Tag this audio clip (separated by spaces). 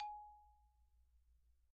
kelon xylophone